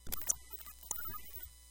vtech circuit bend013
Produce by overdriving, short circuiting, bending and just messing up a v-tech speak and spell typed unit. Very fun easy to mangle with some really interesting results.
noise, circuit-bending, broken-toy, digital, music, micro, speak-and-spell